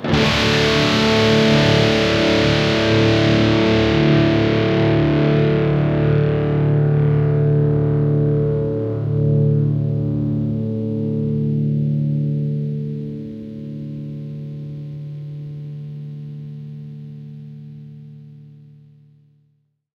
Drop D Chord
2 Channel, Drop-D chord played on a Reverend Rocco through a Hughes&Kettner; Tubeman II on Distortion Channel
amp, bridge, chord, d, distortion, drop, guitar, humbucker, pickup, strings, tube, tuning